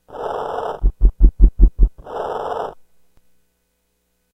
A weird, almost organic machine noise.
machine, mechanical, organic, weird